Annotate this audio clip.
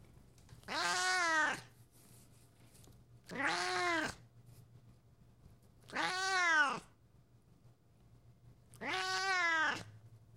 My elderly cat meowing (don't worry, she's not upset, she's just talkative).